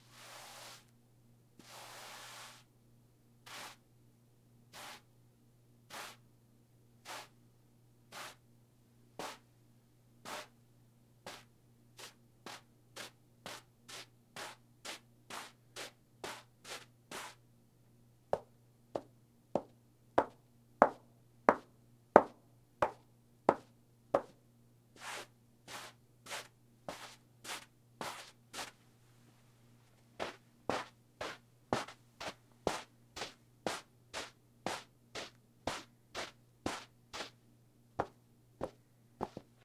carpet on carpet2
Rubbing two pieces of carpet together.
carpet, cloth, footsteps, friction